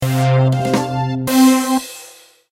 This is a small fanfare progression for when a game begins. Created in GarageBand and edited in Audacity.